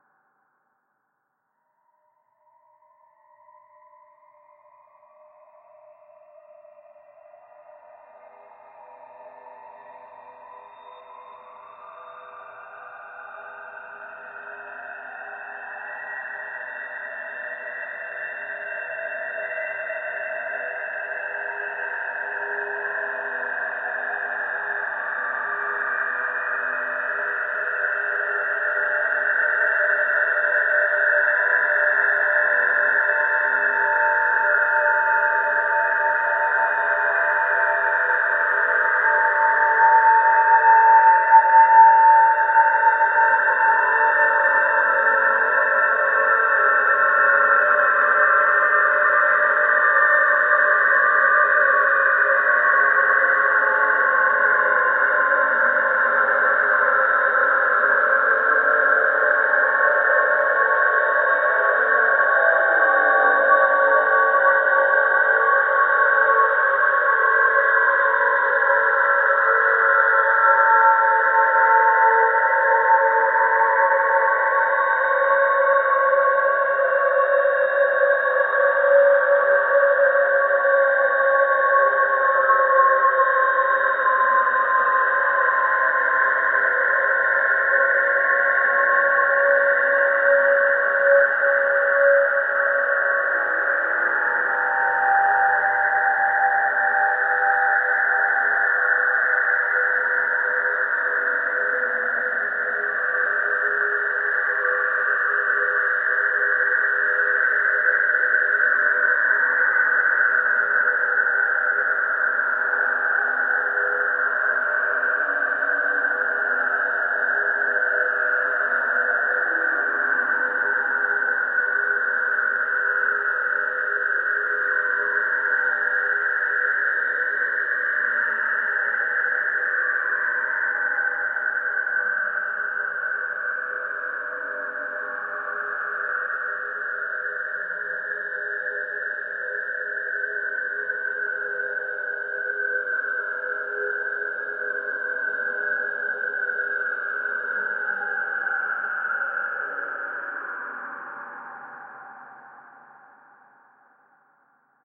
LAYERS 009 - UltraFreakScapeDrone - E4
LAYERS 009 - UltraFreakScapeDrone is an extensive multisample package containing 97 samples covering C0 till C8. The key name is included in the sample name. The sound of UltraFreakScapeDrone is already in the name: a long (over 2 minutes!) slowly evolving ambient drone pad with a lot of movement suitable for freaky horror movies that can be played as a PAD sound in your favourite sampler. It was created using NIKontakt 3 within Cubase and a lot of convolution (Voxengo's Pristine Space is my favourite) as well as some reverb from u-he: Uhbik-A.